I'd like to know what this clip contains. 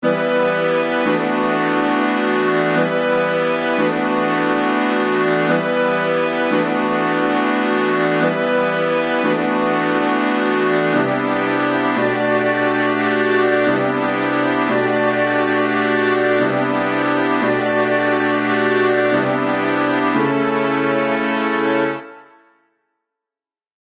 Full Brass
Some Jazz chords with a full wind section
88 BPM
brass, chords, trombone, trumpet, wind, winds